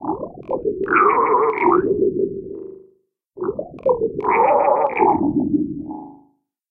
zarkovox gut
Words in a synthetic alien language? Vocal formants applied to low frequency FM synthesis, some reverb and spectral sweeping.
alien, formant